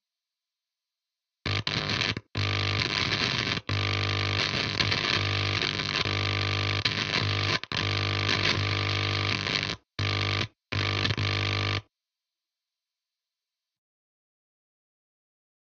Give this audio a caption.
Guitar Hum with Plasma Pedal
Hum of Plugging in an electric guitar with distortion from a plasma pedal - this thing is awesome. more sounds to come.